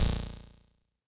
A synthetic noise made from Nord Modular with modulation.
buzz, digital, nord, sound-design